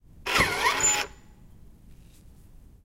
Hand Sanitizer Dispenser
University, Park, Point, Field-Recording, Koontz, Elaine